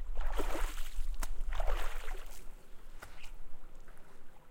stepping out of water
out, step, water